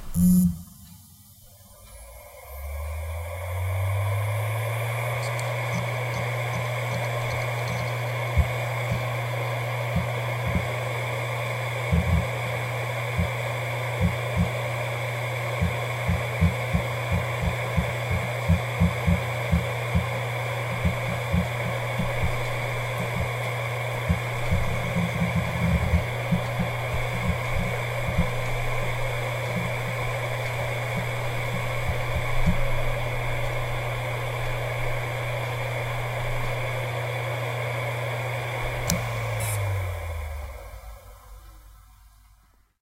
WD Caviar Blue AAJS - 7200rpm - FDB
A Western Digital hard drive manufactured in 2009 close up; spin up, writing, spin down.
This drive has 3 platters.
(wd5000aajs caviar blue - 2009)
disk, drive, hard, hdd, machine, motor, rattle